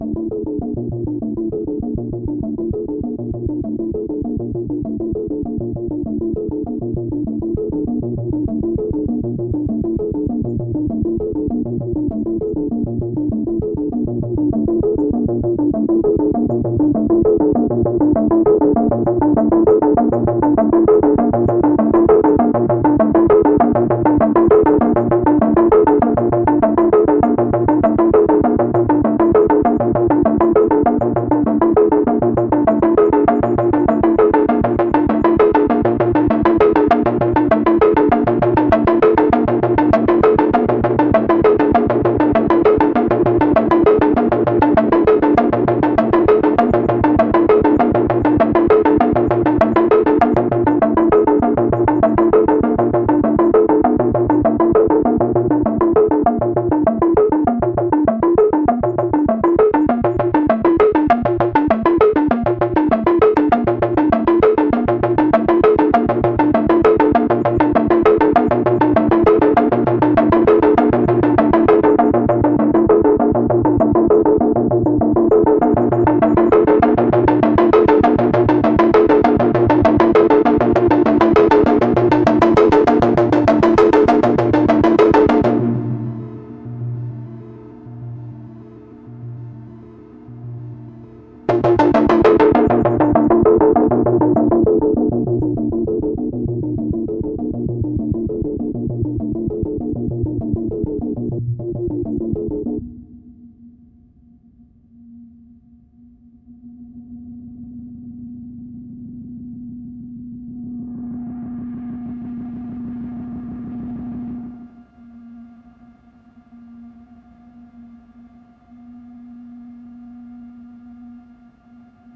A sequence of a single VCO going through ARP filter clone through a holtech-chip based delay.
analog, modular